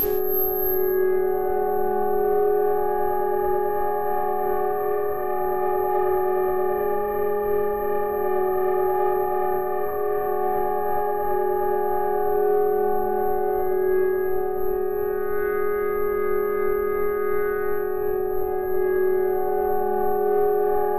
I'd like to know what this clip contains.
A sound made with serum